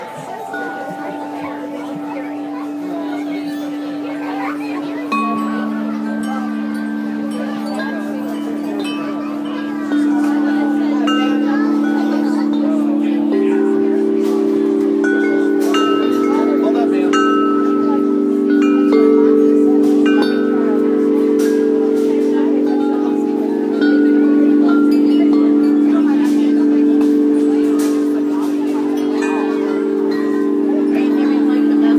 chimes crowd noise
Recorded at a renaissance fair. Chimes and crowd noise in background.